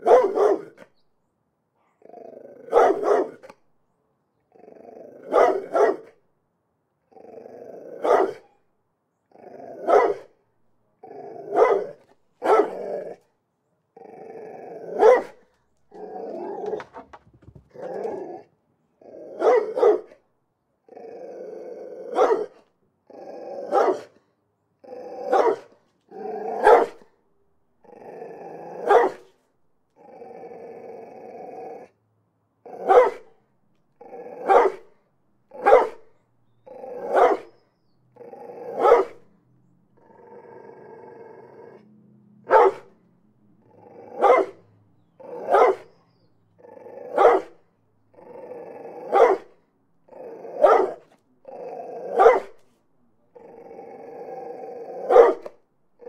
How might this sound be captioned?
11 min of Neighbor dog growling and barking. Not sure of the breed- about the size of a german shepherd. A growl and bark of medium cadence with one scratch at a wooden fence.